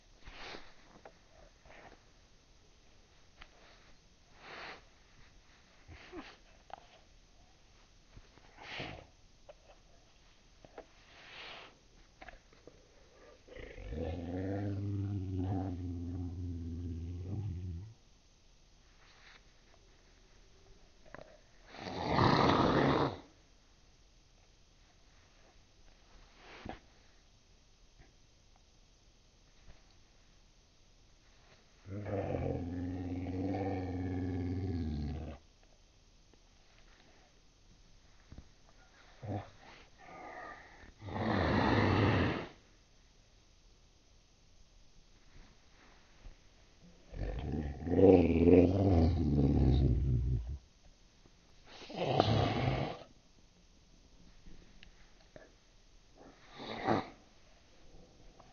Bear like sounds (clean)
Recording of my cat snarling, recorded on Tascam DR 07, reduced the pitch and edited on Audacity.
animal, bear, best, deep, growling, monster, roar, snarl